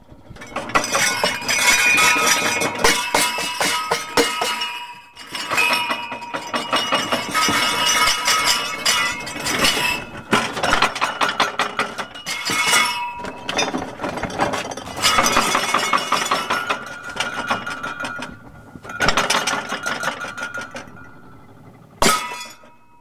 Sounds For Earthquakes - Glasses in Closet 3
I'm shaking a wooden closet filled with glasses. My mom would have killed me if she saw me doing this. Recorded with Edirol R-1 & Sennheiser ME66.
collapse, collapsing, drink, earth, earthquake, glass, glasses, motion, noise, rattling, shaked, stutter